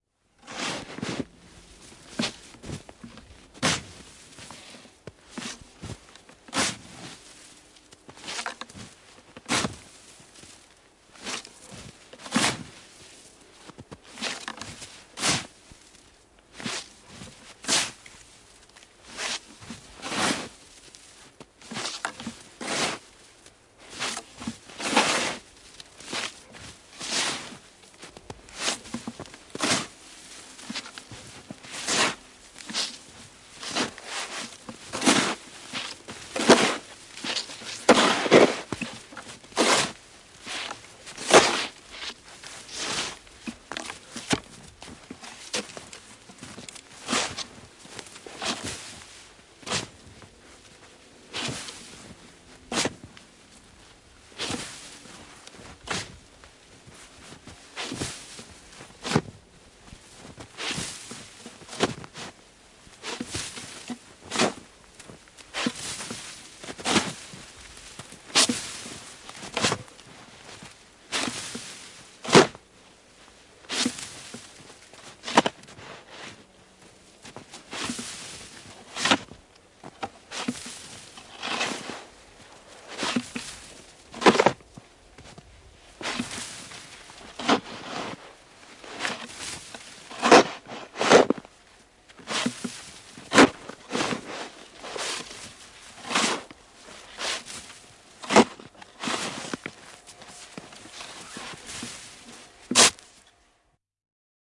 Lumilapio, lapio, lumen luonti / Snow shovel, made of plywood, hard-working shoveling, digging, thick snowdrift
Vanerinen lumilapio, mies luo lunta ahkerasti, paksu hanki.
Paikka/Place: Suomi / Finland / Kirkkonummi
Aika/Date: 21.12.1970
Soundfx, Snow, Tehosteet, Shovel, Yleisradio, Suomi, Field-Recording, Lumi, Lumilapio, Lapio, Finnish-Broadcasting-Company, Finland, Yle, Winter, Talvi